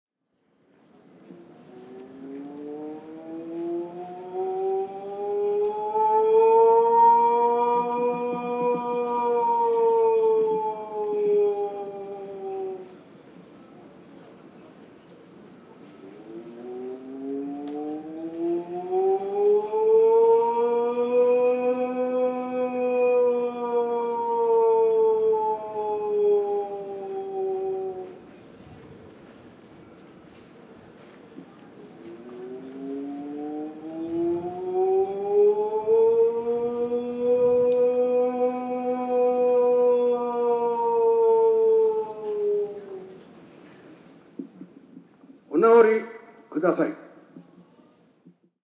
Tokyo - Singing Priest
A chanting/singing priest recorded in Ueno Park, Tokyo on a Zoom H4 recorder, in June 2008. Unprocessed apart from a HPF and basic volume ride at the end.
chant, field-recording, h4, japan, park, priest, sing, tokyo, ueno, zoom